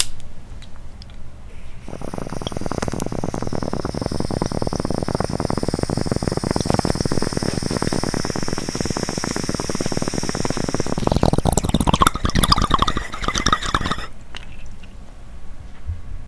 Fine afternoon
420, Bong, Rip